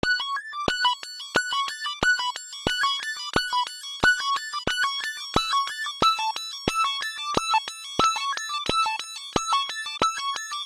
8-kairatune-90bpm-4M
loop made whith kairatune vsti
loop, ambiant, space, electronic